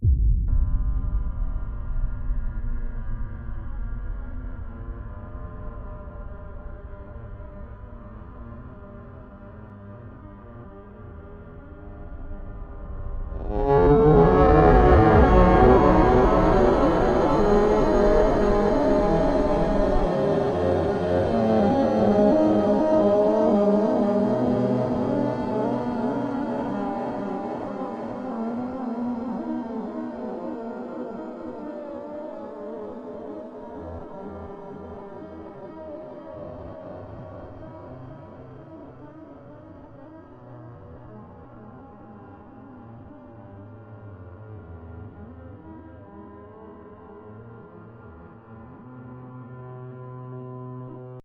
Sounds that I recorded from machines such as tyre alignments, hydraulic presses, drill presses, air compressors etc. I then processed them in ProTools with time-compression-expansion, reverberation, delays & other flavours. I think I was really into David Lynch films in 2007 when I made these...